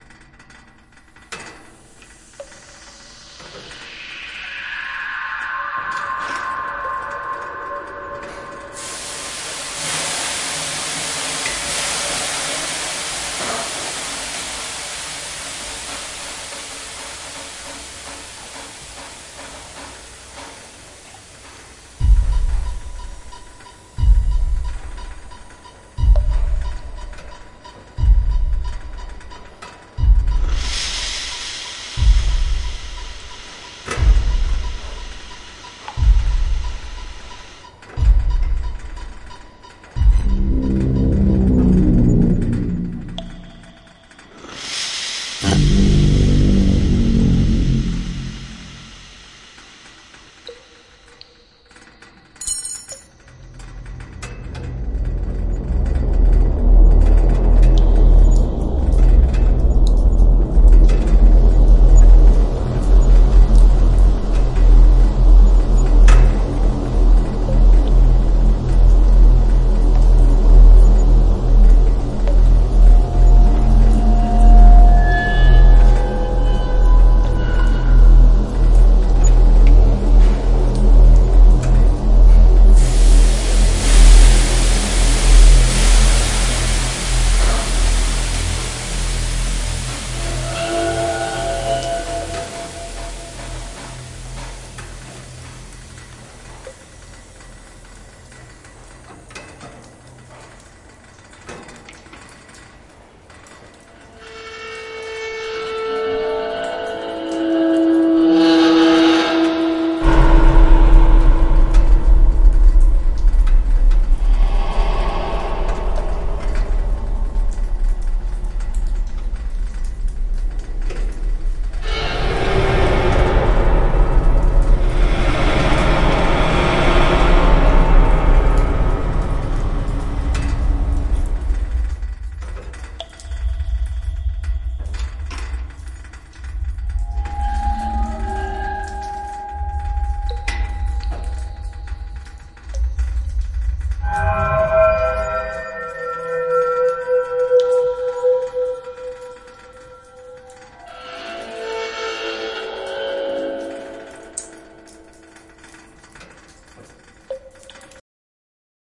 Steam of horror experiment 31
Designed for one of our many hanuted house rooms in 2017. The sound setup is for a lock down factory, where there still is monsters living in it.
drum, halloween, pressure, Denmark, horror, dark, thrilling, hence